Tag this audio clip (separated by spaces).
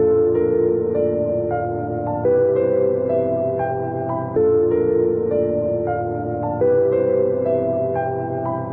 110-bpm 110bpm calm loop piano